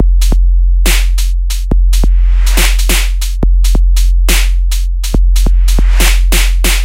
Dusbteploop 140BPM 7
drum, dubstep, hat, hi, loop, shaker, snare